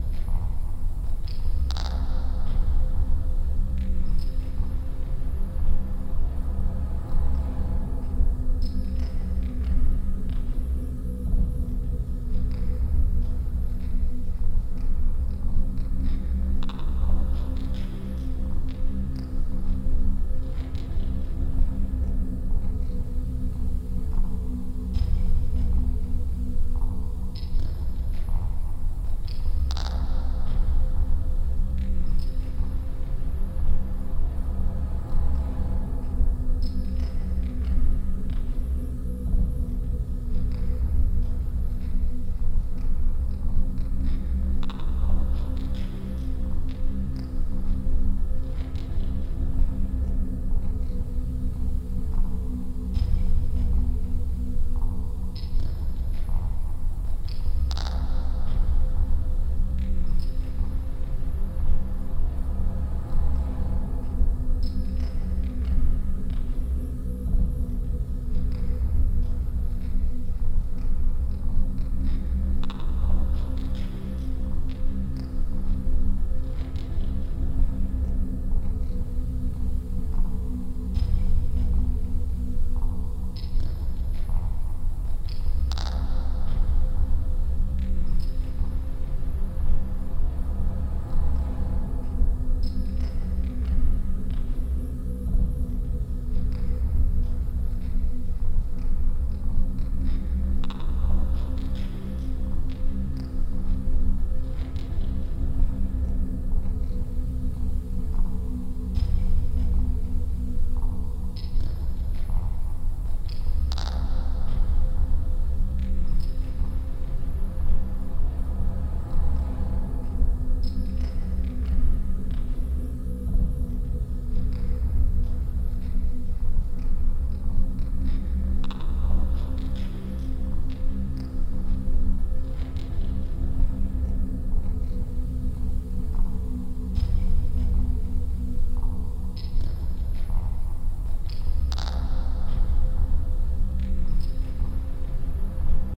slowed voices scratches and pops
layers of droning male vocal slowed down and looped with various pops, scratches, and thumps run through an assortment of guitar pedals including reverb, harmony, echo, delay, etc...